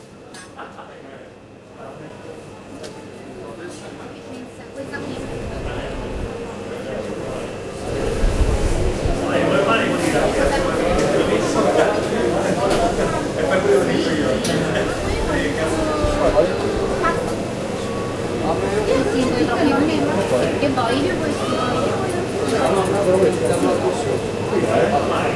2012 instabul street zoom h1
city, field-recording, instanbul, people, street, turkey